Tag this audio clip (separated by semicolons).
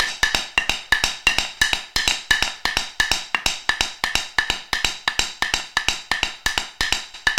130-bpm; acoustic; ambient; beam; beat; beats; board; bottle; break; breakbeat; cleaner; container; dance; drum; drum-loop; drums; fast; food; funky; garbage; groovy; hard; hoover; improvised; industrial; loop; loops; lumber; metal; music